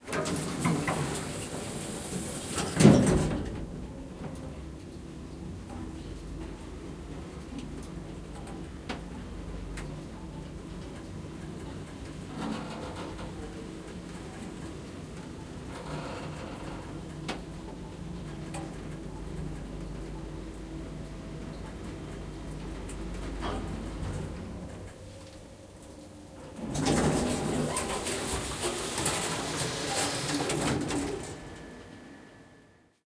closing elevator doors, moving elevator
An elevator in a hotel closing its doors, moving and opening doors again.
lift, door, close, elevator, open, ride, closing, hotel, move, opening, doors